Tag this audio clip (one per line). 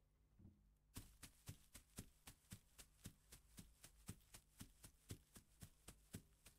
Fast
feet
Field-Recording
Foley
foot
footsteps
step
steps
walk
Walking